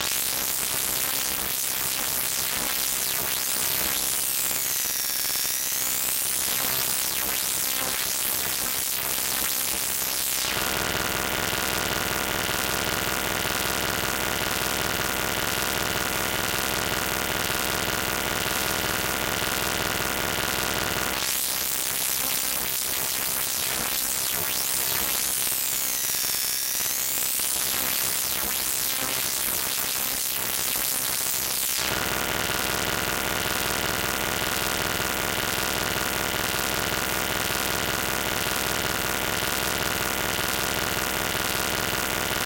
pulsar synthesis 07
Sample generated with pulsar synthesis. A tonal drone with a rhythmic wash.
noise, pulsar-synthesis, drone